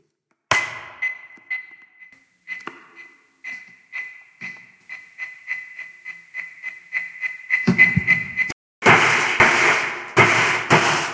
Ticking Time bomb
A sound that is a BIT like a bomb. made with an alarm clock and lego
army, field-recording, robotic